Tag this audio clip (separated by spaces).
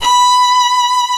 keman arco